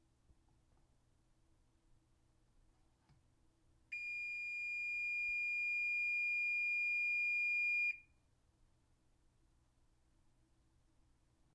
the timer goes off for an oven